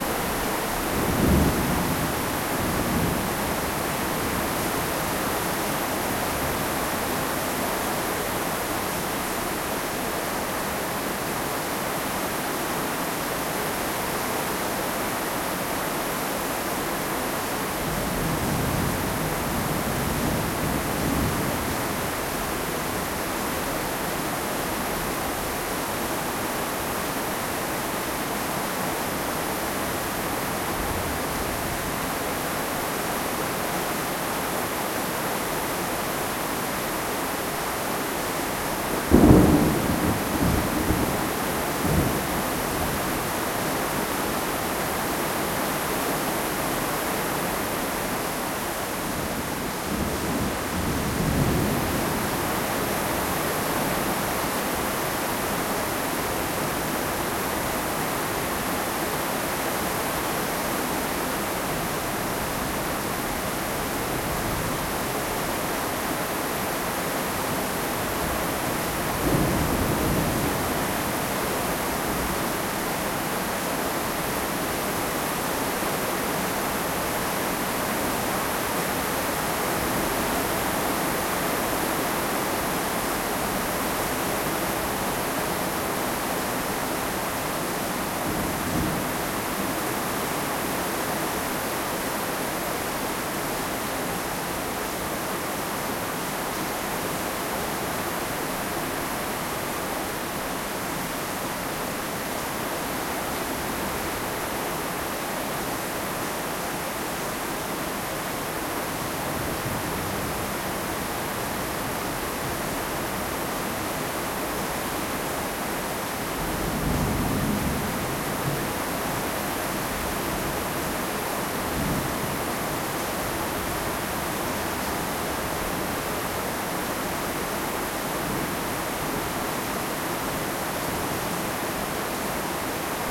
Bangkok rain. recorded with Zoom H1.
bangkok, lightning, rain, thunder